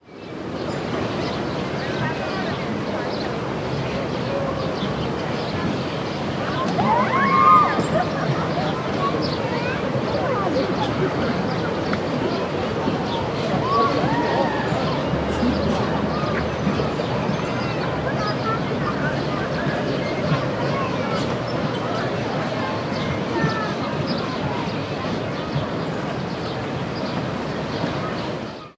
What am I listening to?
Set of recordings made for the postproduction of "Picnic", upcoming short movie by young argentinian film maker Vanvelvet.
ambience, barcelona, ciutadella-park, exterior, mono, urban, walla